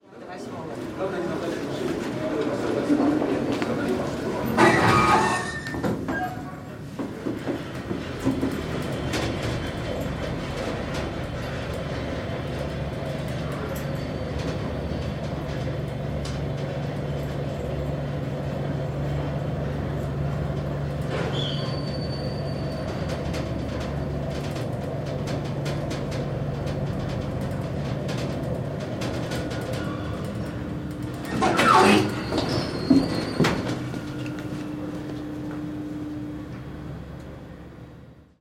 Elevator university2
Lomonosov Moscow State University
inside, open, close, russia, opening, machine, elevator